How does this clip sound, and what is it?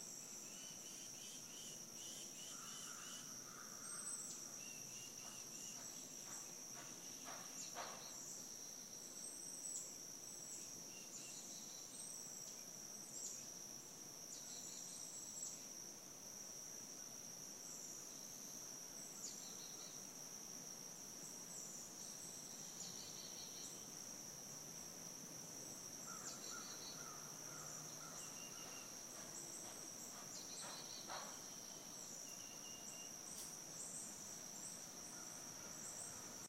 Cicada Insects 8 26 13 7 54 AM
Short field recordings made with my iPhone in August 2013 while visiting family on one of the many small residential islands located in Beaufort, South Carolina (of Forrest Gump, The Prince of Tides, The Big Chill, and The Great Santini fame for any movie buffs out there).
South-Carolina, tropical, insects, low-country, insect, USA, semi-tropical, crickets, frogs, cicadas, night, hot, Beaufort, nature, humid, jungle, field-recording, day, forest, summer, birds